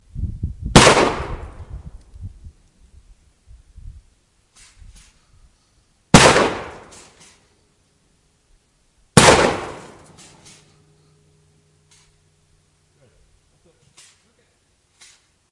shotgun targetside
the sound of a shotgun being shot at a target nearby, if you listen closely, you can hear the next shell being shucked.